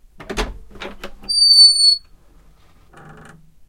Opening a creaking door
Opening a creaking wooden door. Squeaks are heard.
door, wooden, opening, creak